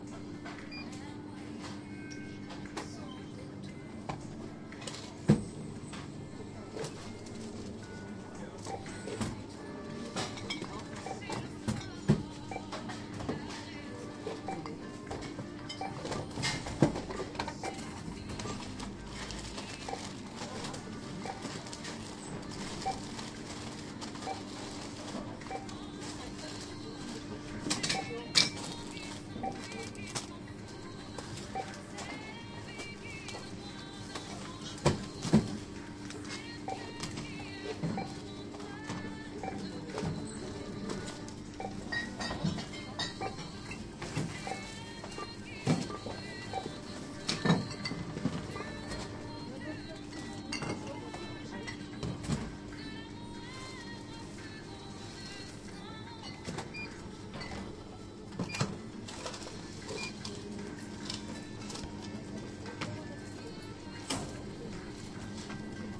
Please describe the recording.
a german supermarket. the microphone was placed between two checkout counters. lot of customers at this moments.
beep, counter, market